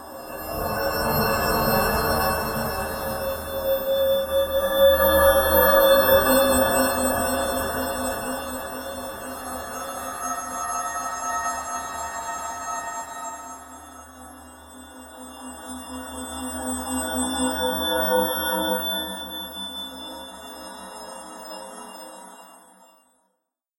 Rewind Block Swap 04b05b06b
ethereal, atmospheric, Mammut, synthetic-atmospheres